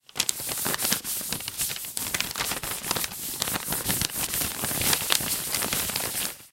frotar dos folios entre si
papers, two, rub